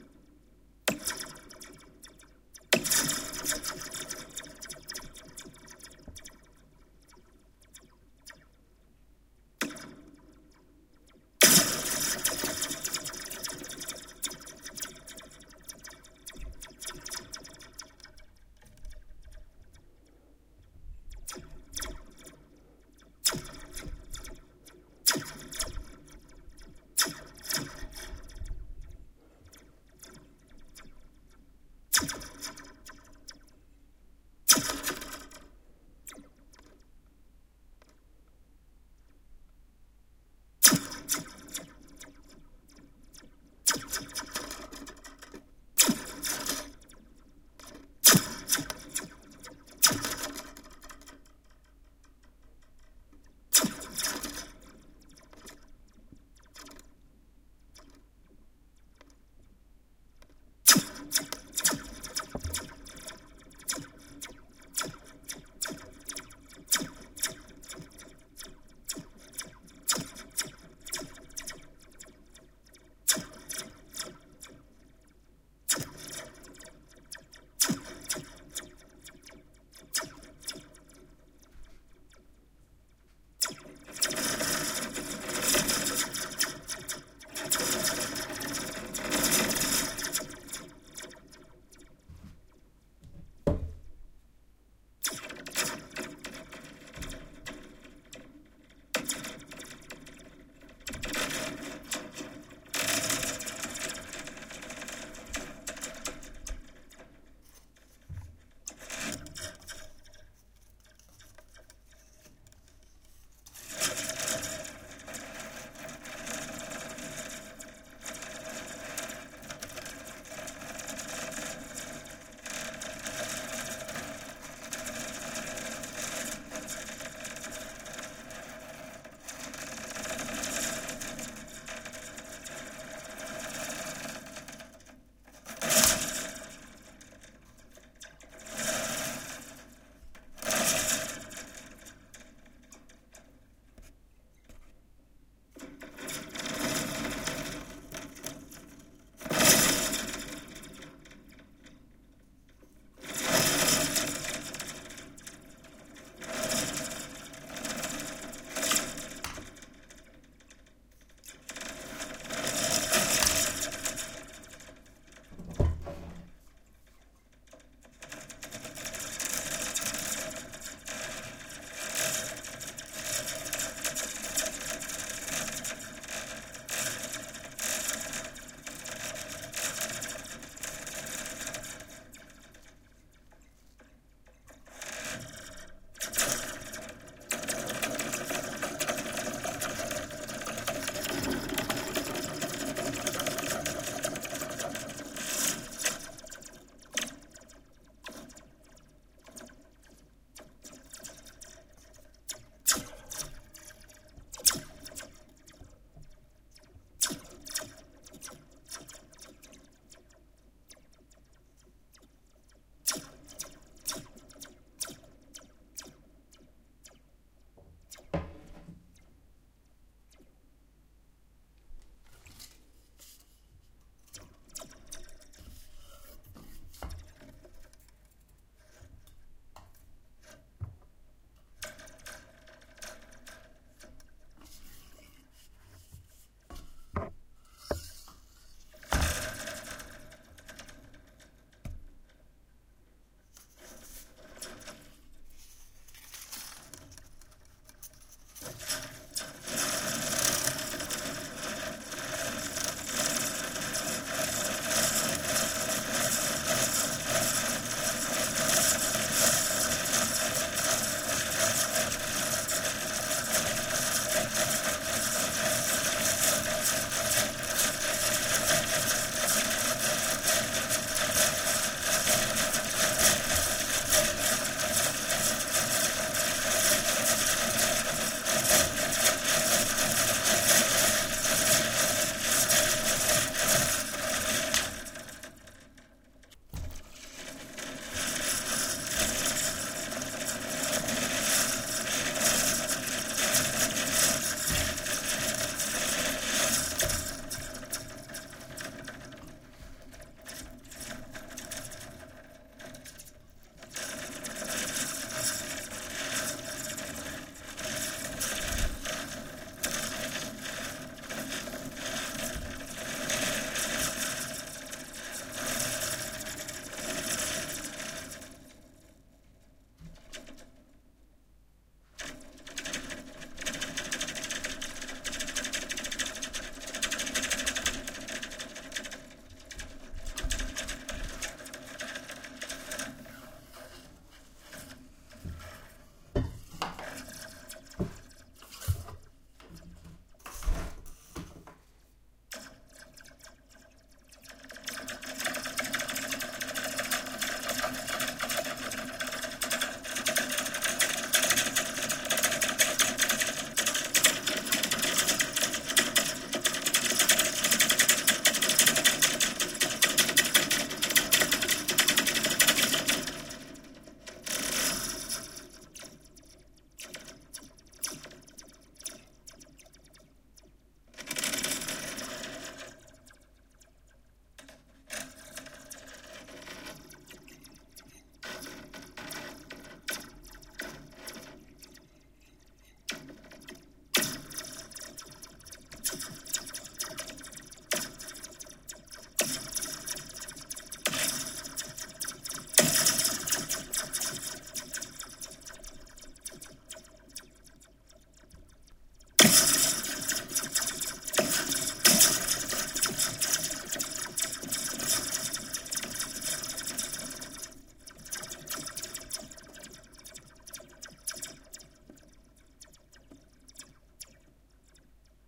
Slinky Foley
Playing with a slinky.
Slinky Sound Recording Setup
Recorded with a Zoom H2. Edited with Audacity.
Plaintext:
HTML:
richard-james, foley, zoom-h2, spring, toy, helical, metallic